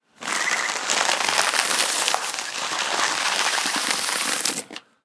pasar papel sobre superficie rugosa
paper, rough, rub, wall